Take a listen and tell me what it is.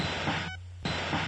A kit Made with a Bent Yamaha DD-20 Machine
bending, bent, circuit, drumkit, glitch, yamaha